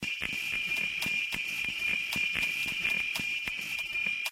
sound-design created from processing a field-recording of a bicycle bell ringing as it passes; processed with Adobe Audition